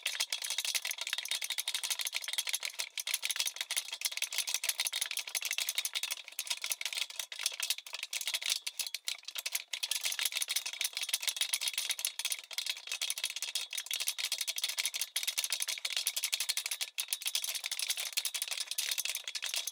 Metal Rattling Small
A small rattling piece of metal.
metal, mechanic, rattle